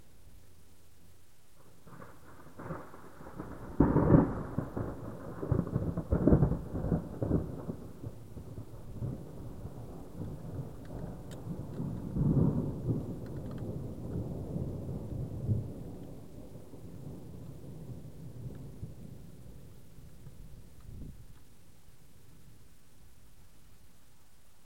Huge thunder was recorded on 30th-31st of July, nighttime in a thunderstorm occured in Pécel, Hungary. The file was recorded by my MP3 player.
storm, field-recording, thunderstorm, rainstorm, thunder, lightning, weather